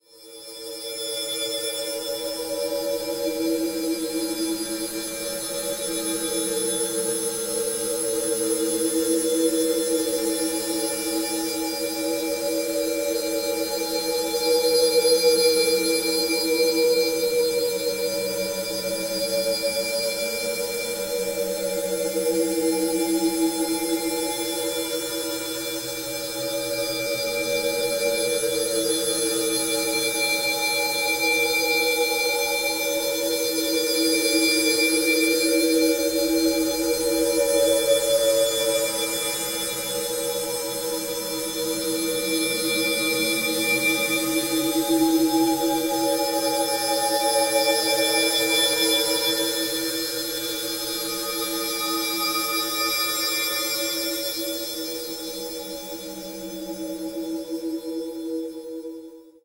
Ambient Atmosphere 02
ambient, atmosphere, dark